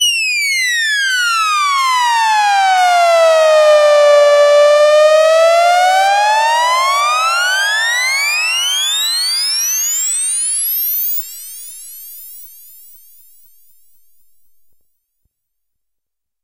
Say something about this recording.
ambienta-soundtrack arppe2600va-upinthespace
designed for the 'ambienta' soundtrack, bounced for the chapter 4... than we decided to play live this chapter with double bass, acoustic guitar and synthesizer... so this sound is kinda outtake but will tweak this and others patches from the Arppe2600va (great beast btw! The show will take place tonight! i'm a bit excited, hope to have some fun :)
abstract, analog, analogue, cartoon, cinematic, classic, contemporary, effect, electro, electronic, falling, filters, fx, happy, jingle, oldschool, pitch-bend, rise-up, rising, scoring, ship, sound-effect, soundesign, soundtrack, space, spaceship, sweep, synth, synthesizer, takeoff